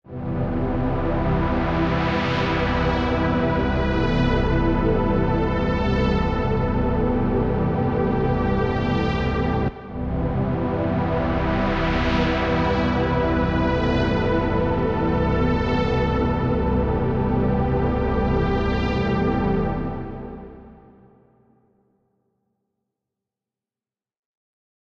Just testing out some analogue sounding pads.